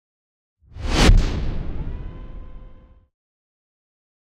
Cinematic Woosh SFX-012
Cinematic Woosh effect,is perfect for cinematic uses,video games.
Effects recorded from the field.
Recording gear-Zoom h6 and Microphone - RØDE NTG5
REAPER DAW - audio processing
sound, riser, indent, swoosh, implosion, stinger, industrial, movement, trailer, effect, video, thud, boom, cinematic, sub, reveal, metal, deep, whoosh, transition, epic, hit, game, bass, gameplay, sweep, tension, explosion, impact, logo